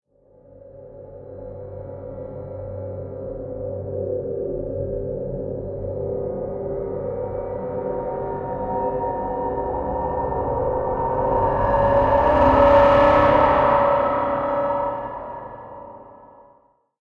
Scary Hits & Risers 005
cluster, effect, freaky, fx, hit, hollywood, horror, movie, riser, scary, sound, sounddesign, soundeffect